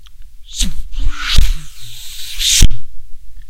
Energy pass/swoosh
An energy effect inspired by anime Fate/Zero or Fate/Stay Night series.
anime, energy, explosion, fate, impact, laser, magic, spell, swoosh, teleport, woosh